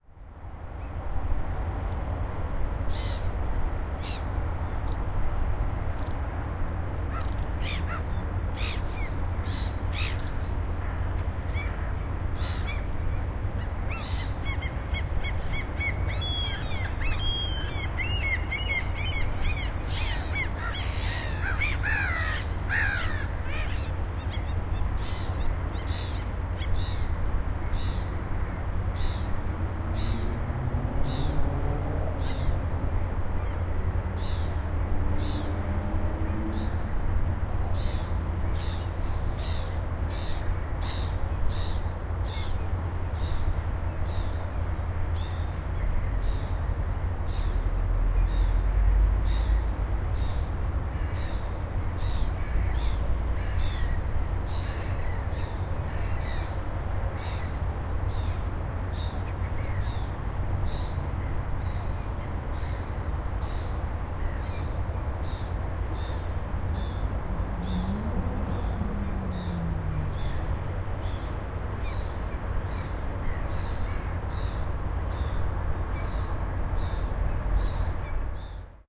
Ambient harbour with seagulls and distant traffic.